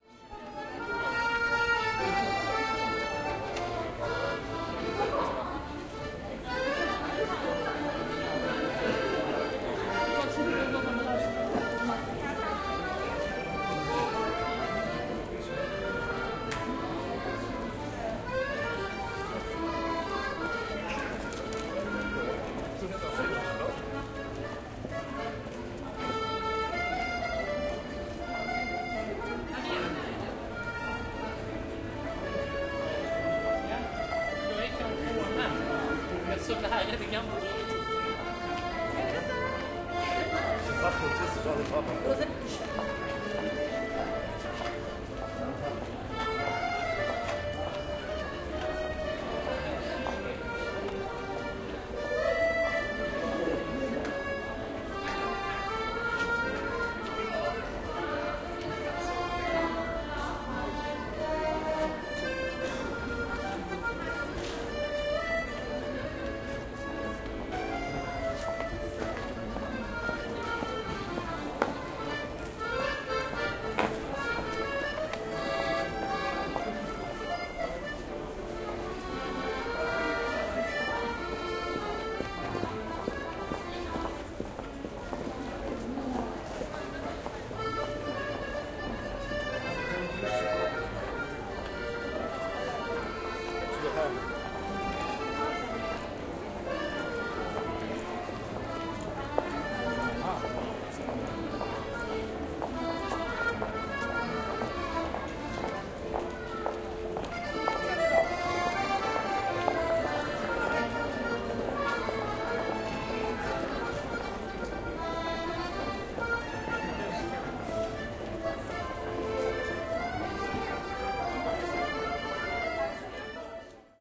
18.03.2012: about 5 p.m. Polwiejska street in the center of Poznan in Poland. Young Gypsy girl accordion-player. In the bacground usual hubbub of the street.